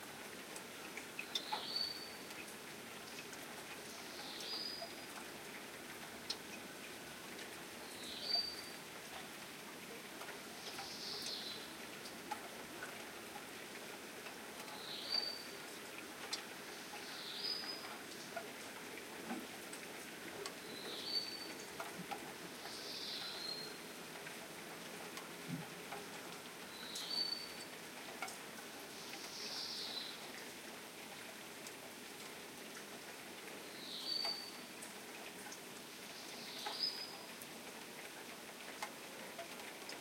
Rain & Birds
ambience, ambient